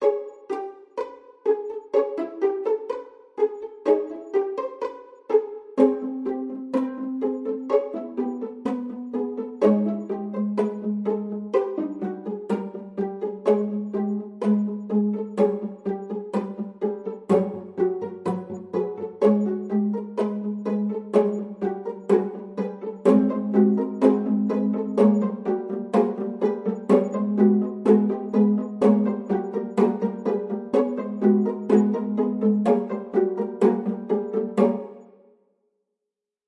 First Mushrooms
classical, forest, note, strings, string, mushroom, acoustic, cello, pluck, pizzicato, notes, instrument, nylon